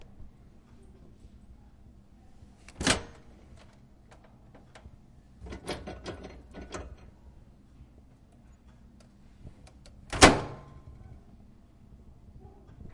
knit inside indoors room
indoors, room, knit